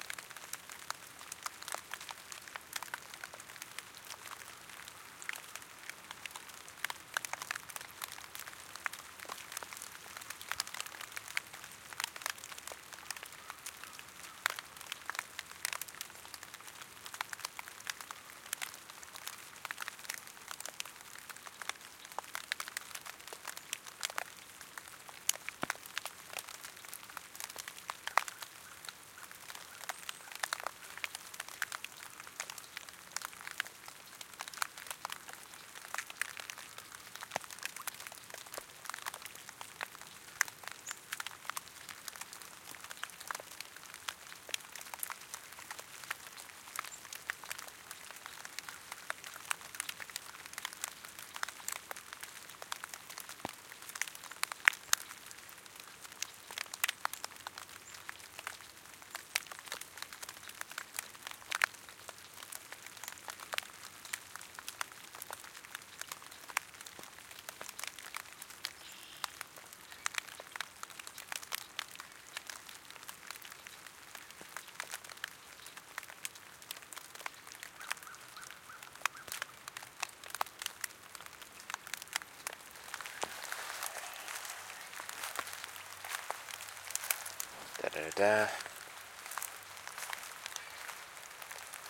rain drips on wet leaves
drips, leaves, rain, wet